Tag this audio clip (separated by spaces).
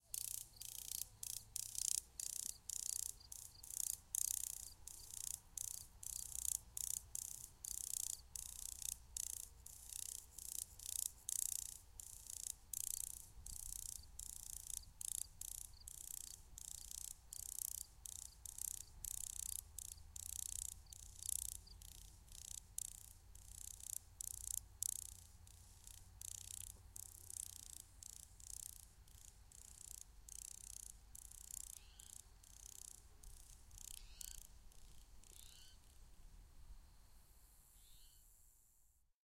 grasshopper insect